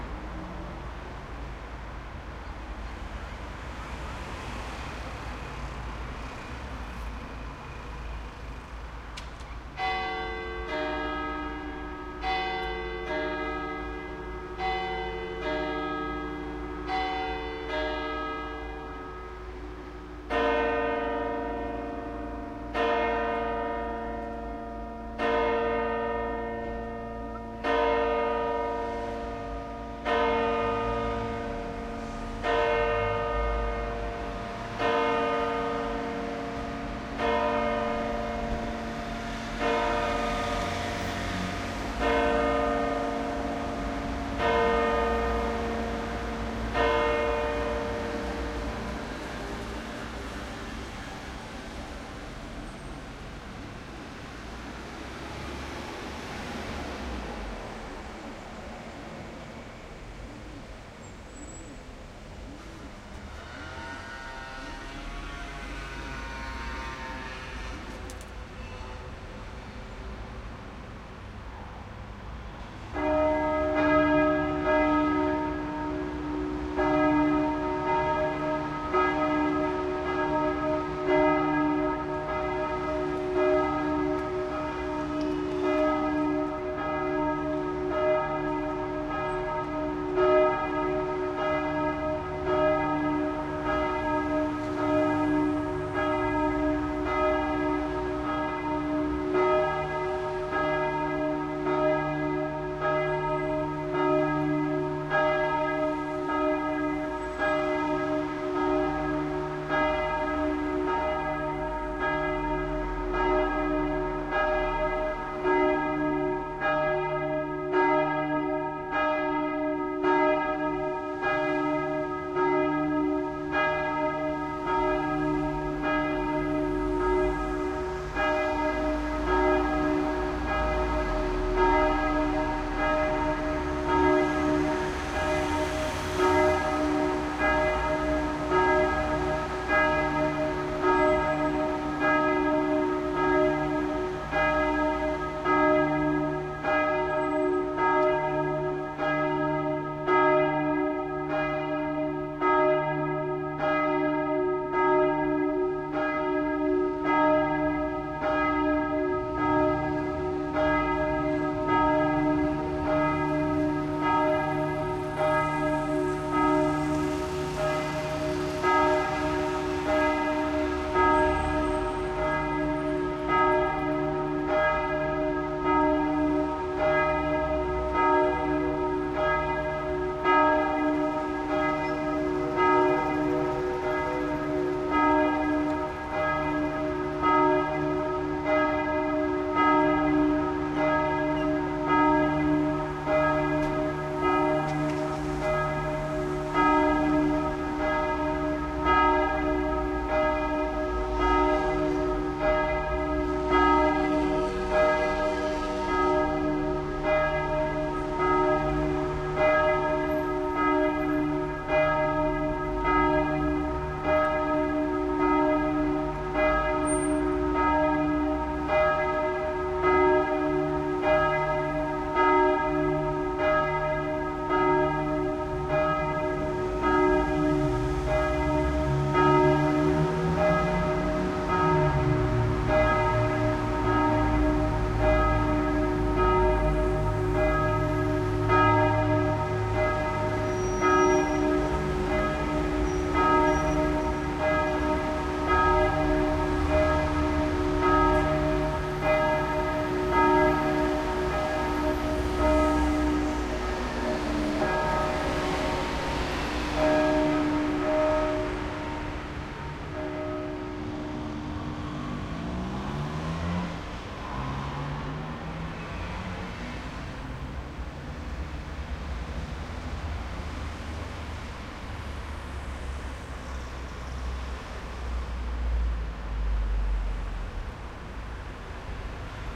Church Bell 12o'clock + Mass
Church bell ringing 12 o'clock noon, trafic, people, Church bell ringing for mass. Recorded in Luzern Nov. 2011 with Zoom H2 recorder